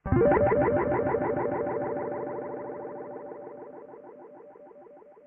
Delayed guitar harmonics
analog, bloop, delay, guitar, loop